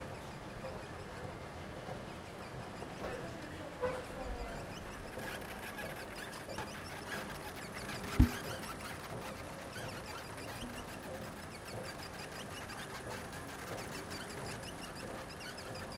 we can listen sounds recorded at home